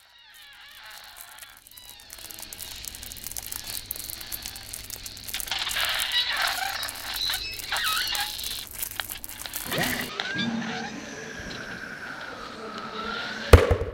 Caçadors de sons - Desgracia
A workshop in which we are introduced to some tools and methodologies of Sound art from the practice of field recording. The sounds have been recorded with portable recorders, some of them using special microphones such as contact and electromagnetic; the soundtrack has been edited in Audacity.
Cacadors-de-sons, Fundacio-Joan-Miro